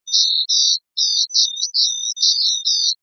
Another encrypted sound created with coagula using original bitmap images. Spectral view reveals secret message...
ambient, encrypted, hidden, message, space, synth